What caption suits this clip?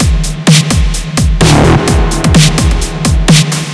Download and loop.
131770 - Bertroff - Sub Kick
169788 - Bliss - snaps
173164 - Bliss - Kicks
173163 - Bliss - Snares
All ran through effect chamber, Amplitube Classic 80's reverb
128, 128bpm, 808, beat, boom, break, breakbeat, club, compressed, dance, disco, hard, house, power, solid, state, trash